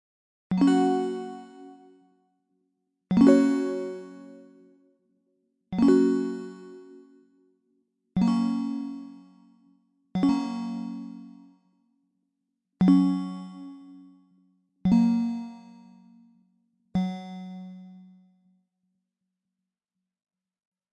Some bell chords, that can be used for games and stuff like that.
Quelques accords avec un son de cloche, à utiliser dans des jeux ou autres.
I'd be happy to be mentionned and/or to hear about how you use my sounds, but that is 100% optional !
Have fun and good luck with your projects <3